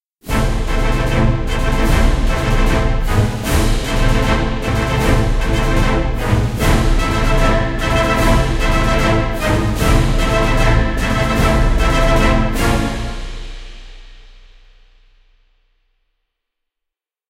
Military march music made with several orchestral libraries in a DAW Studio One, the instrument section is composed by : Trumpet, trombone, french horns, viola, cello, snare drum, bass drum, cymbal and timpani.
orchestral, movie, war, army, film, intro, melody, background, strings, music, military, drum, snare, orchestra, attack, dramatic, trumpet, soundtrack, cinematic